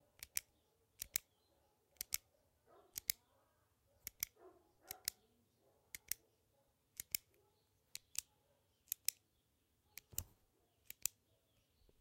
pen click
click, film, OWI, pen